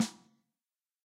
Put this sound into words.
Snare14x65CustomMahoganyRightHandSoft
Sampled from a custom 14inch by 6.5inch mahogany single ply (steambent) snare. Mics used were a shure sm57 close mic, and neumann km 84s in an x-y position as close as I could get to my head, to best approximate the sound the drummer hears from his perspective. Available in left and right hand variations with four increasing velocities; soft, mid, hard, and crack.
stereo, acoustic